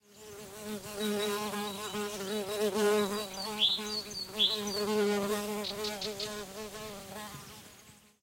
Bee buzzing around, bird callings in background. Recorded near Arroyo de Rivetehilos (Donana National Park, S Spain) using Audiotechnica BP4025 > Shure FP24 preamp > Tascam DR-60D MkII recorder
ambiance, birds, buzz, donana, field-recording, forest, insects, nature, south-spain, spring